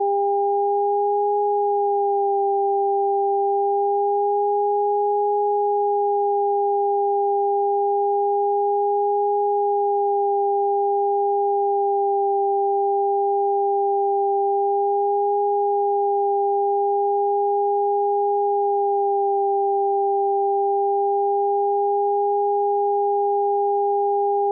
This is an (electronic) atmosphere processed in SuperCollider
ambience, ambient, atmosphere, electronic, processed, supercollider